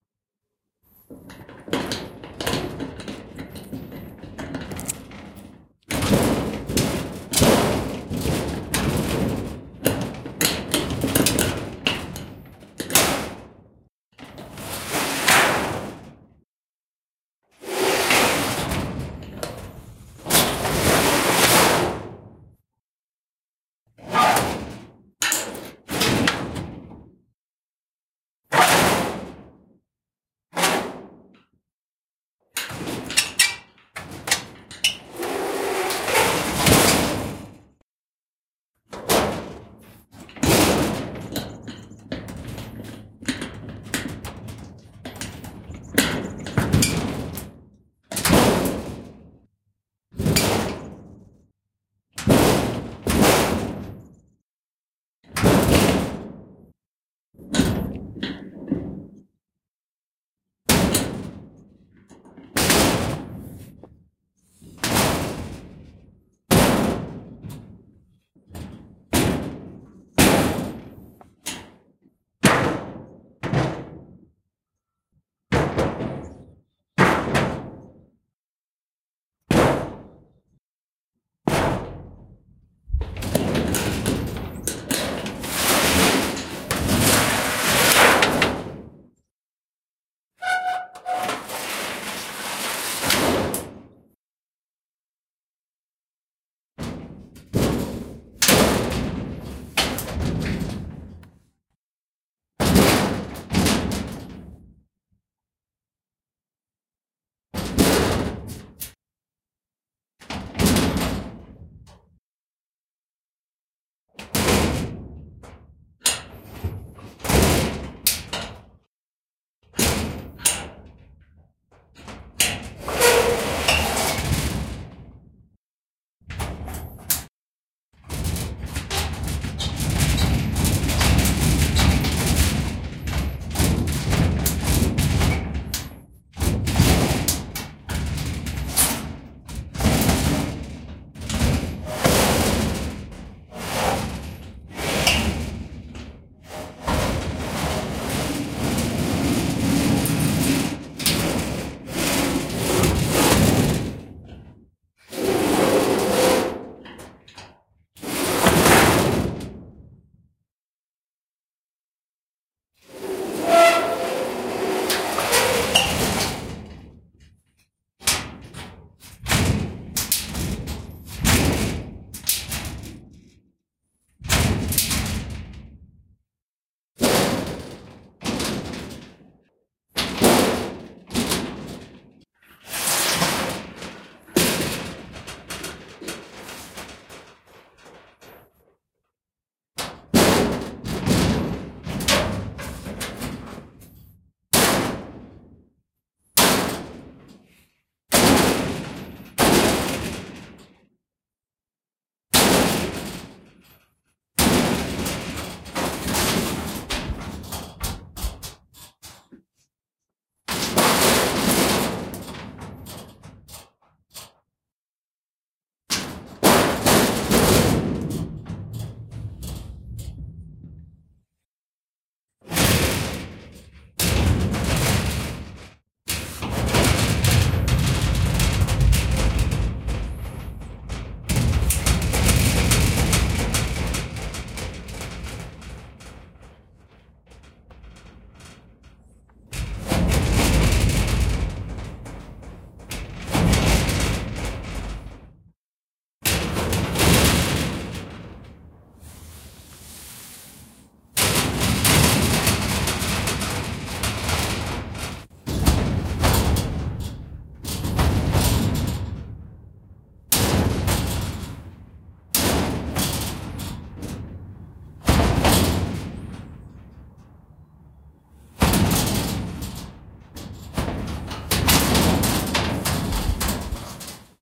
Multiple impacts and rattles obtained from my metal shed door. Recorded with Zoom H4 mic.
I used these sounds in my project that featured a large metal factory building manufacturing construction machinery (through heavy pitch shifting and bass sweetening). With some effects processing, these recordings can create great cinematic impacts and atmosphere.
Markers inserted through Audition to mark out some of the sounds I found particularly useful.
Would love to hear what you use them in.